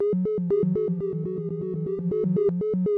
A sound created specifically for the Continuum-5 mini-dare: Symetric-sounds.
I started playing with a simple idea: use only a simple synthesized sound containing only a Triangular wave. Envelope, echo (delay) and pitch variations allowed. Nothing else.
I created a number of such sounds in Reason using the Thor synth.
I experimented around looking for interesting effects (mostly phasing effects) and the interplay between the pitch variations between the normal and reversed versions of the sound.
synthetic, reversed, overlayed, synthesized, Continuum-5, Symetric-Sounds, triangular-wave